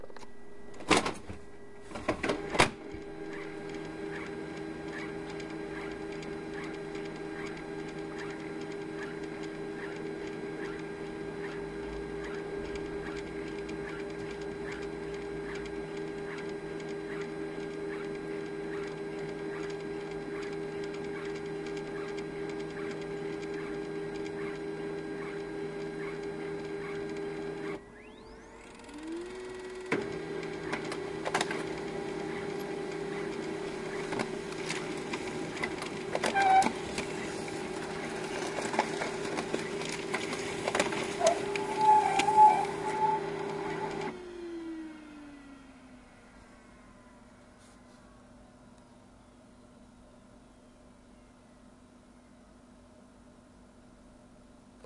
samsung laser printer 2 steps clog
samsung laser printer clogging in two squeaky phases. Edirol R-1
machines, laser-printer, field-recording, office, clog, printer, environmental-sounds-research